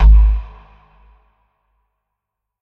BASS RVB 6
bass, reverb